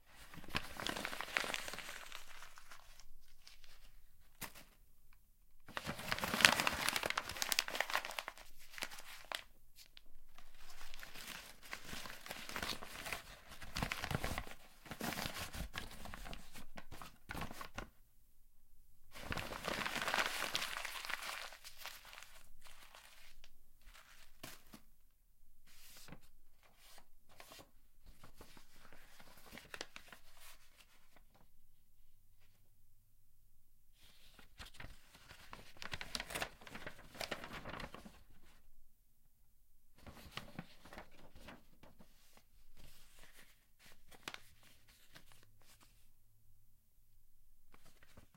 Office paper crumple folding handling
Crumpling office paper into a ball, then folding and handling a sheet of paper.